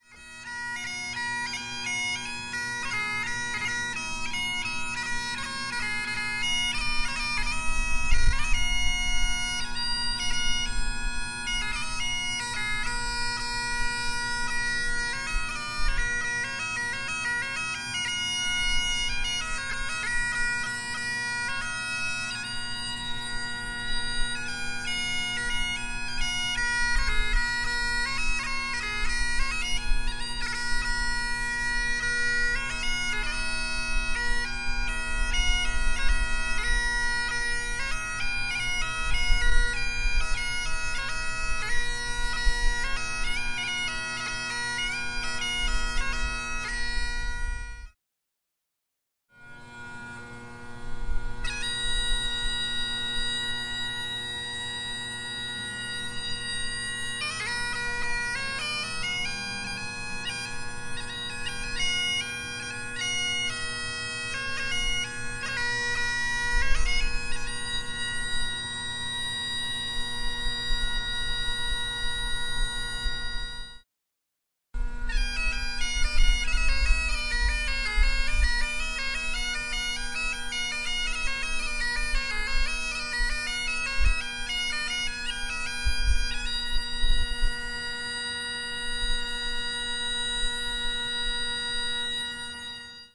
Bagpiper Close High Park Aug 2 4 00 PM -
Bagpiper practicing in High Park August 2, 2021 afternoon
I separated it into three sections to get rid of some wind. There's a bit of wind, especially in the third part.
Recorded with H4n
Music, Bagpipe-Practice, Field-Recording, High-Park